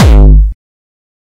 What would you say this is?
Distorted kick created with F.L. Studio. Blood Overdrive, Parametric EQ, Stereo enhancer, and EQUO effects were used.
progression, melody, drumloop, trance, kick, drum, beat, distorted, distortion, synth, techno, hard, hardcore, bass, kickdrum